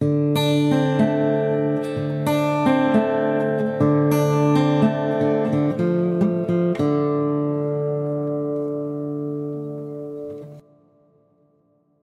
DADGAD tk89 RIFF 2E
I play my old Yamaha acoustic guitar as a change from all the electronic stuff - I fear I am beginning to suffer Species Amnesia whereby we lose the feel of real music and sound making so this is real hands on therapy. This sample is a blues like riff using open tuning - DADGAD.
acoustic-guitar, music, blues, guitar, riff